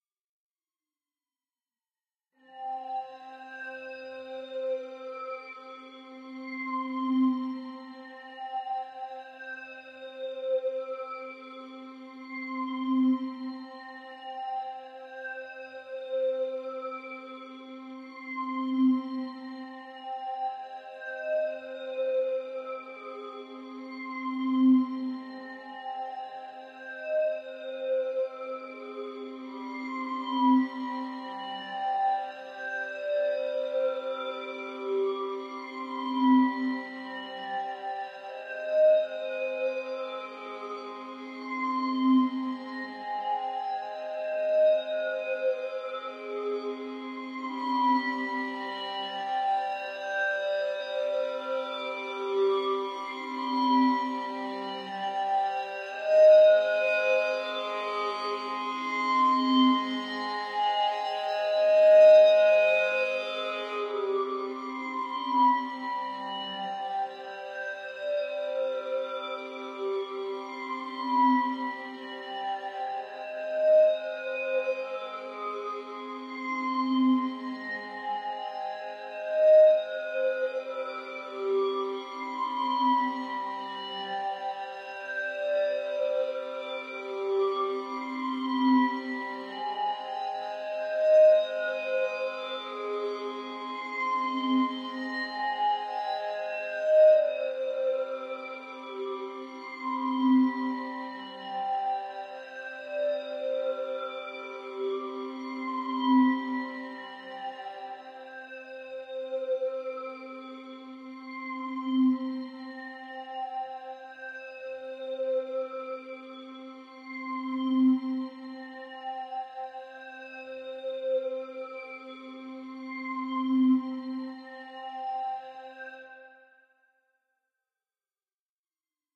Spooky - Dramatic Violins. Logic Pro Samples processed with Valhalla Pitch Delay and Reverb.
Dramatic, Spooky, Glide, Sweeping, Violins, Glassy